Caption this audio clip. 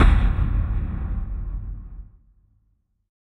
cannon - afar mortar recording - microphone Neumann KMS 105
cannon, battle